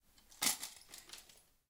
Picking up a broken picture frame, and glass shards moving around. Works great for a crash sweetener, or as debris.